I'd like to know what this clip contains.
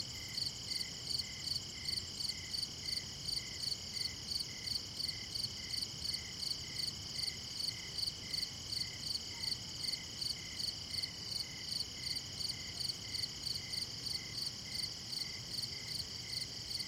crickets night short nice some skyline Montreal, Canada
Montreal, night